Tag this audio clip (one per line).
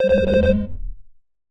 splash
button
alarm
typing